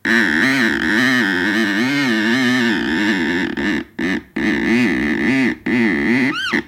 sounds produced rubbing with my finger over a polished surface, may remind of a variety of things
door, screeching, grunt, groan